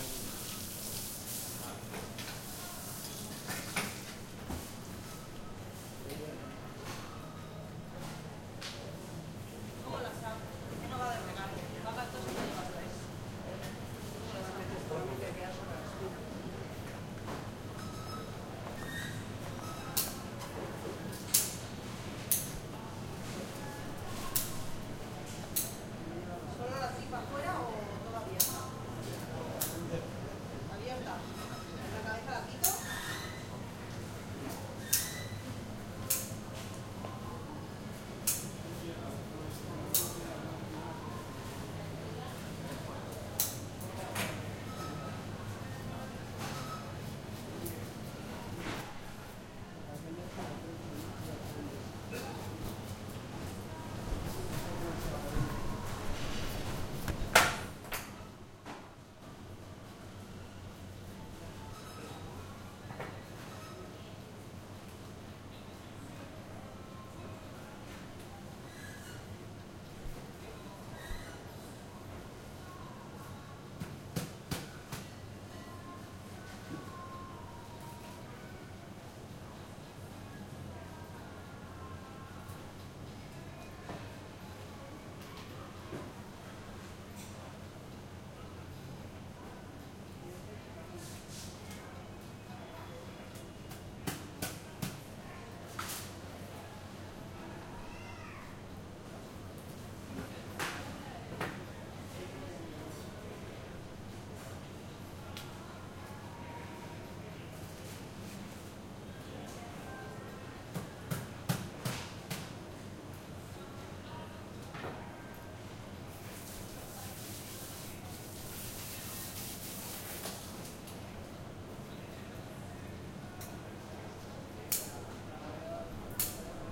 A couple is buying fish in the Carrefour supermarket of Barcelona. Firstly we can listen how the dependent is cleaning with water the marble. Later we can listen how she cleans the fishes (4 fishes), how she removes the scales, how she cuts the heads and finally, how she removes the entrails to them.